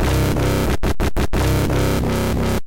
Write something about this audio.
180 Krunchy dub Synths 05
bertilled massive synths